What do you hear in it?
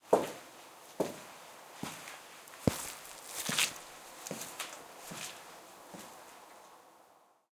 Stereo recording of a person walking on concrete floor from one side of a room to the other.